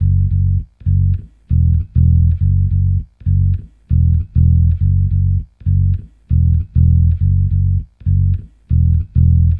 FunkBass GrooveLo0p Gm 2
Funk Bass Groove | Fender Jazz Bass